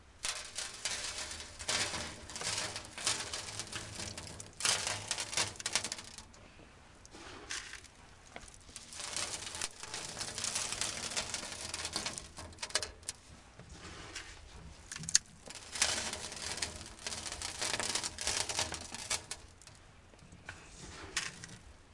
sand pour on metal FF664
sand, sand pour on metal, metal
metal, pour, sand